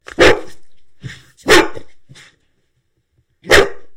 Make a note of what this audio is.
Medium-sized dog barking.